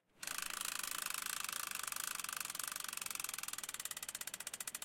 Cargobike chain slowmotion
Sound recordings we did for Urban Arrow Electric Cargo Bike, some foley sounds